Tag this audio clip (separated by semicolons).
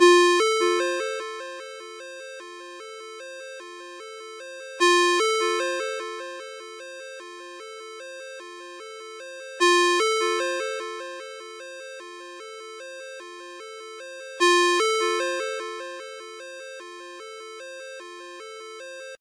alarm; alert; alerts; cell; cellphone; mojomills; phone; ring; ring-tone; ringtone